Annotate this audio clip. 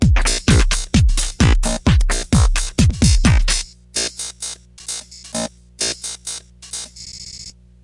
ProgHouse 130 bpm
effect, 130bpm, emx-1, grain, hardware, proghouse, shifter, drums